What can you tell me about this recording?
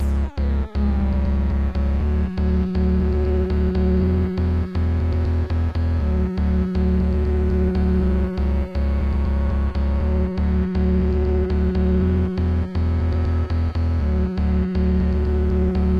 an experimental bass loop with effects. recorded in samplitude at 120bpm, 8 bars in length.